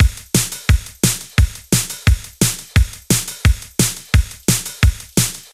RAW Drum Loop (174 BPM)
A drum loop created using Bitwig and third partie effects and processors. This loop can be used anywhere but would suit any Drum and Bass banger!
174, music, EDM, Drums, Drum-and-bass, DnB, Loop, BPM, electric-dance-music, electric, dance